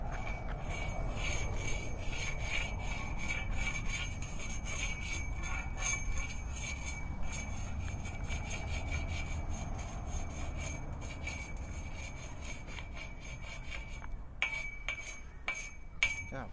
sonicsnaps EBG 3
Stone over lamppost.
Field recordings from Escola Basica Gualtar (Portugal) and its surroundings, made by pupils of 8 years old.
sonic-snap
Escola-Basica-Gualtar
Escola-Basica-Gualtar, sonic-snap